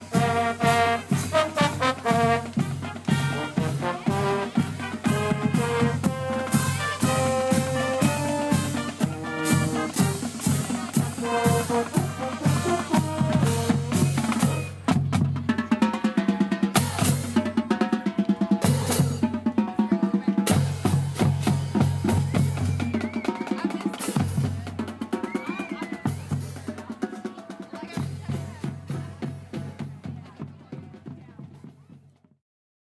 High School Marching Band 02
Recorded with a zoom h4n at a parade. A high school band playing and walking by.
Drum-Line, drums, High-School, Marching-Band, Parade, Football-game